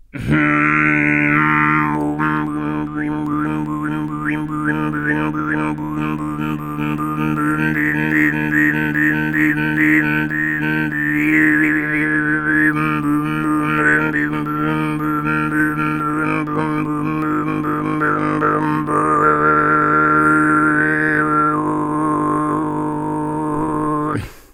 alfonso low variations 06
From a recording batch done in the MTG studios: Alfonso Perez visited tuva a time ago and learnt both the low and high "tuva' style singing. Here he demonstrates the low + overtone singing referred to as kargyraa. This file has some variations in it, made with the tongue and lips.
throat, kargyraa, overtones, singing, tuva